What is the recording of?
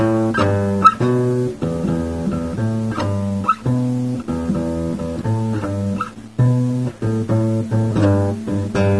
idk dumb little guitar thing